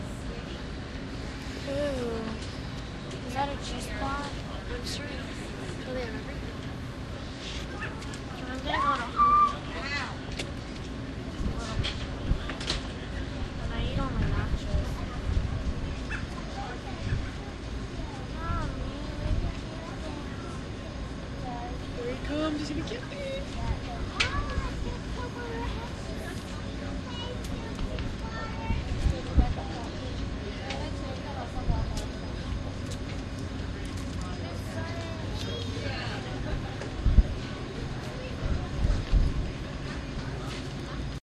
capemay ferry barlong
An empty bar from the starboard deck on the Cape May-Lewes Ferry heading south recorded with DS-40 and edited in Wavosaur.
boat, field-recording, new-jersey, ocean